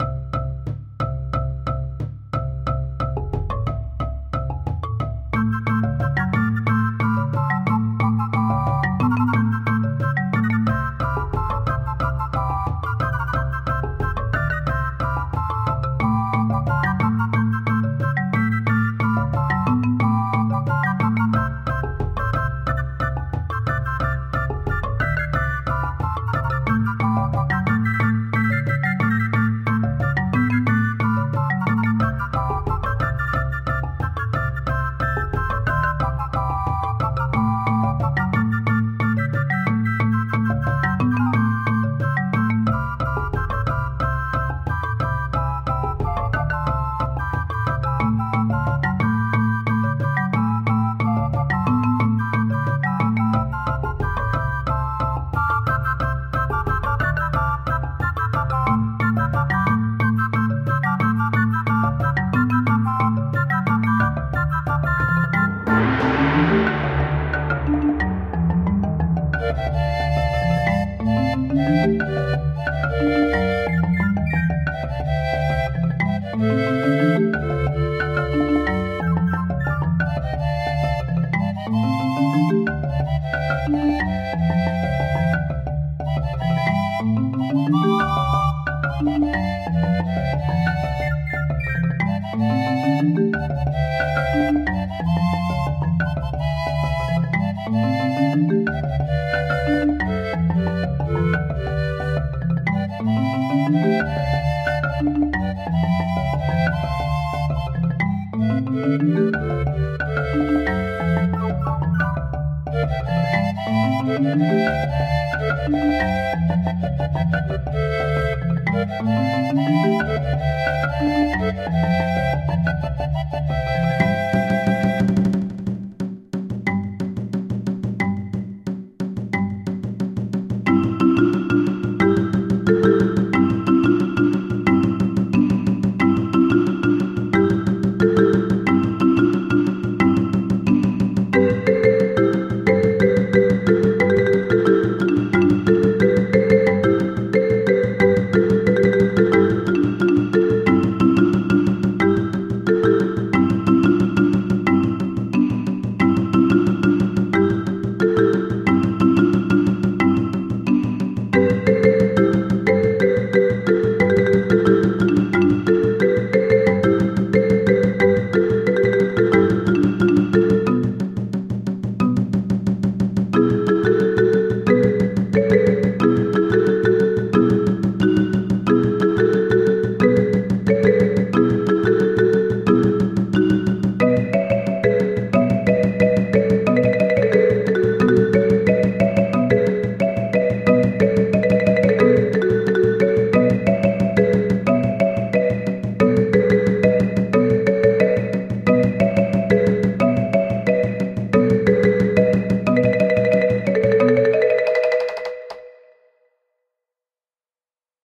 Rừng Xanh Hoang Dã
First version of game loop for game Đôn Hổ level 4. Long loop good for RPG. Create with Garageband and use World Music Jam Pack. 2018.10.19
120-bpm, forest, game-loop, jungle, rpg, tropic